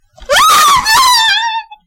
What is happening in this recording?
I recorded my voice while playing freelance horror games; SCP-087-B and Slender Sanatorium. this was so I could get genuine reactions to use as stock voice clips for future use. some pretty interesting stuff came out.
english, female, girl, scream, speak, talk, voice, woman